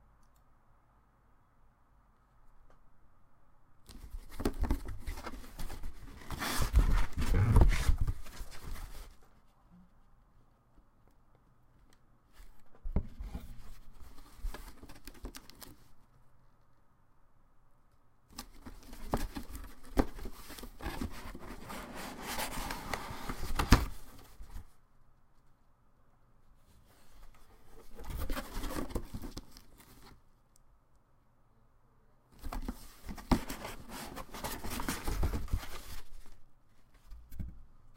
Cardboard Box Opening
Several recordings of a cardboard box being opened at various speeds and urgency levels. Recorded on Blue Snowball for The Super Legit Podcast.
sliding, opening, open, foley, box, cardboard